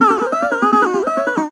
Glitched riff from a circuit bent toy guitar